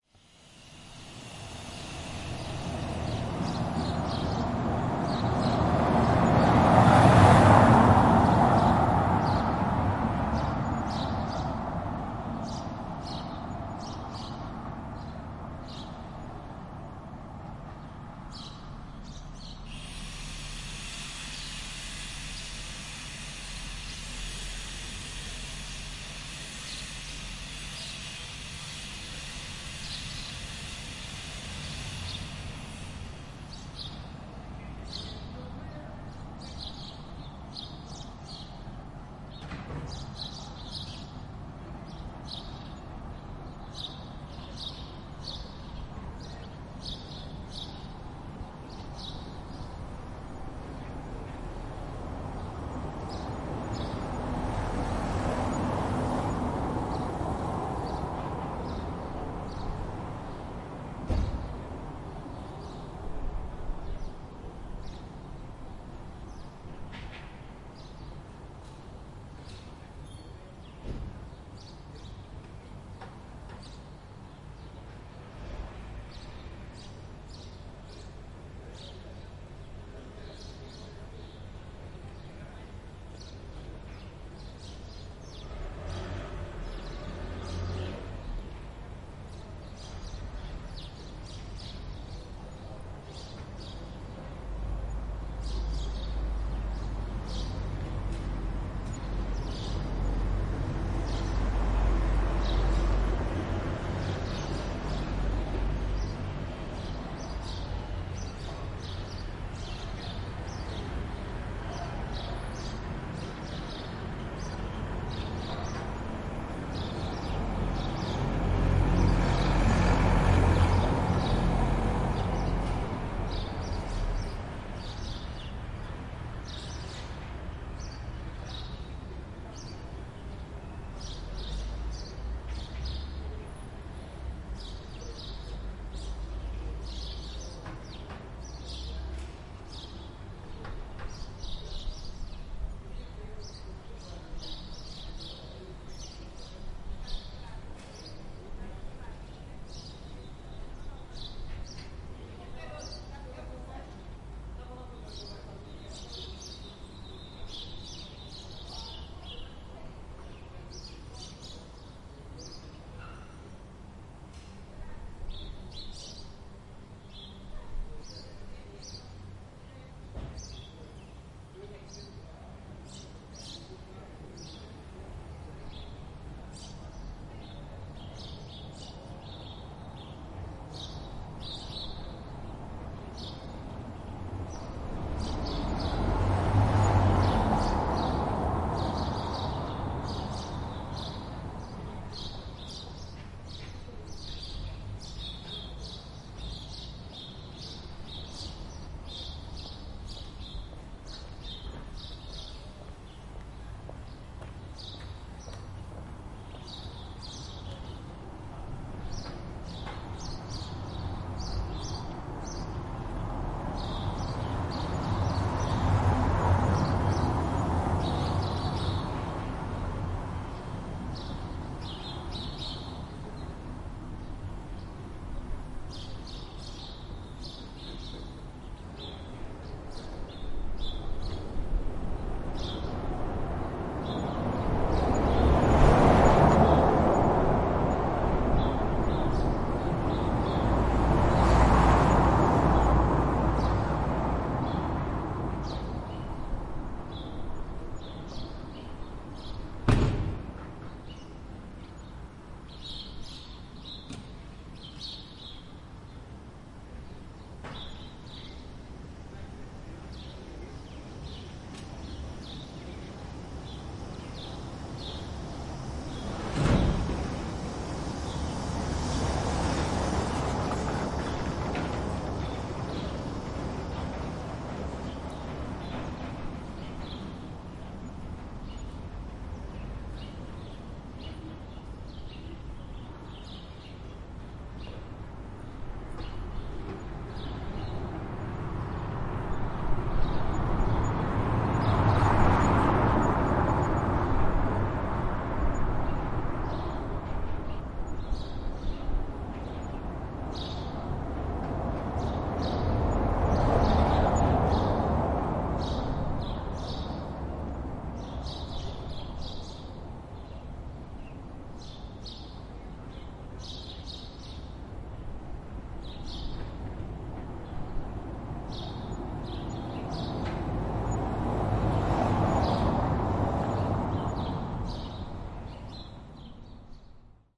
[11]castelo branco#travessa da ferradura
A small square in the border of the Castelo Branco Old Town with the city center. Recorded using Zoom H4N.